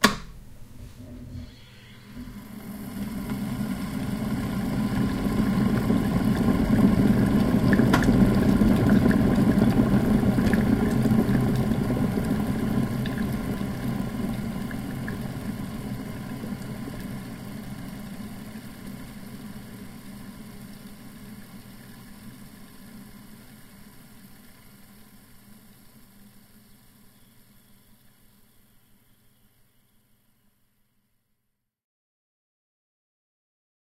kettle quickboil
Sound of a flat plate kettle coming to the boil after recently being boiled, microphone pointing towards the spout - featuring clear bubbling and fizzing of boiled water.
Recorded using a Zoom H1 with the built in stereo microphones.
boil, coffee, field-recording, kettle, kitchen, tea